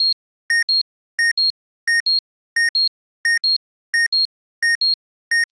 archi scifi alarm tracking 01
Science fiction alarm for radar or tracking an object. Synthesized with KarmaFX.
alarm, danger, fictional, indication, indicator, science-fiction, scifi, synthesized, synthesizer, tracked, tracking, warning